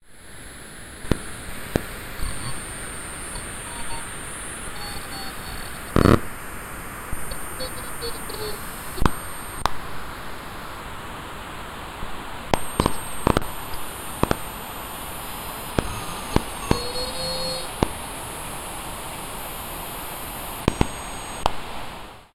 Sweeping radio bands. Made on an Alesis Micron and processed.
alesis, clicks, interference, micron, radio, static, synthesizer, white-noise